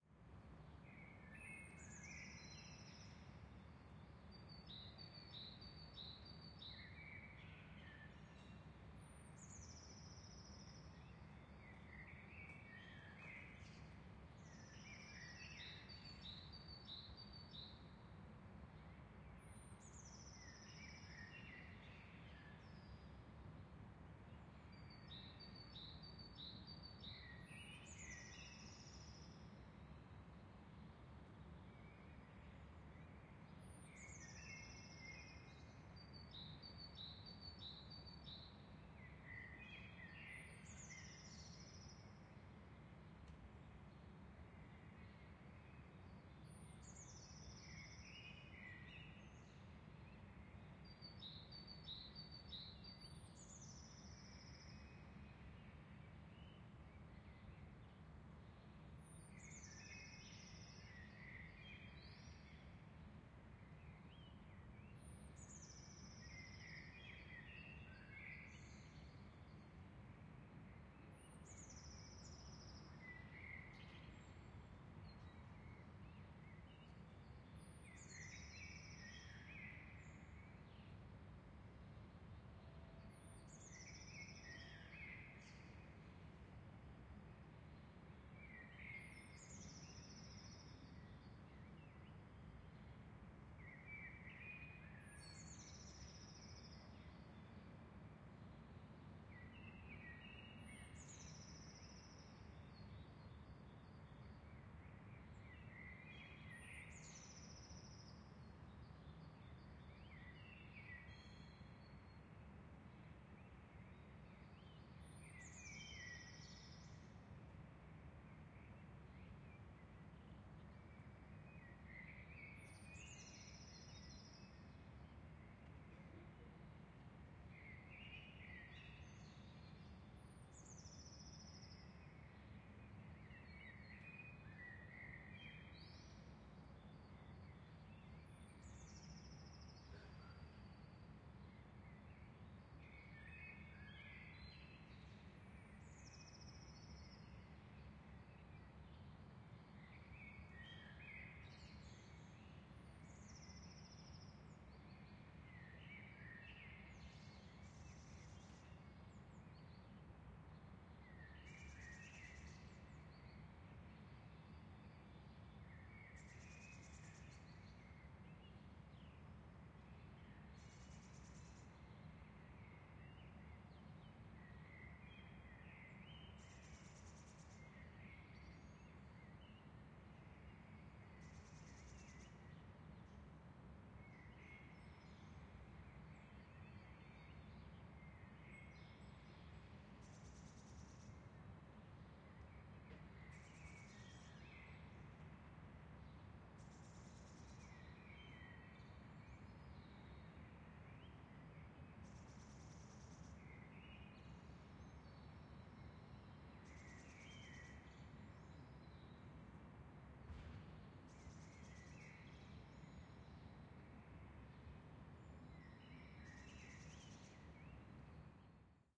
Early Summer Morning Ambience with Birds, Berlin
Very early (~4am) summer morning between a couple of old east Berlin highrise residential buildings. It was still dark but the birds were already quite awake.
General city noise in the background, other than that rather quiet.
Recorded with a ORTF pair of MKH40 on a Sound Devices 744T.
ambience, AT, Atmosphere, Berlin, bird-call, birds, birdsong, City, early, early-morning, EXT, general-noise, morning, residential, sunrise